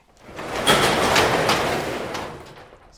Industrial Garage Door Storage Gate Open
industrial, garage, door